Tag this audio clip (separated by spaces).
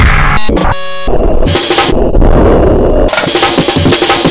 breakcore glitch noise